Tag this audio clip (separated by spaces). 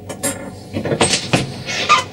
chair crunch engine moving part sample stand up